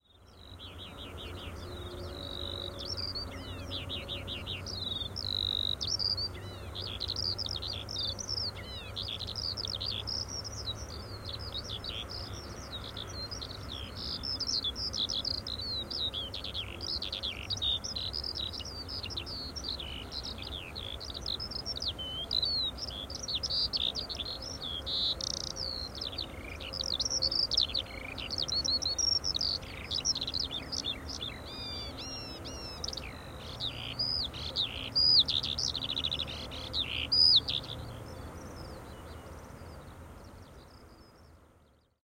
Eurasian Skylark, Alauda arvensis, singing high in the sky it's typical twittering song during spring. Marantz PMD671, Vivanco EM35 on parabolic shield.
skylark, Alauda-arvensis